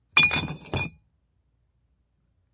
Box Of Bottles Put In FF254
One bottle rolling into another, tinging, moving glass, glass-on-glass. Medium pitch, sliding bottle
Box-of-bottles, Glass-on-glass, Sliding-bottle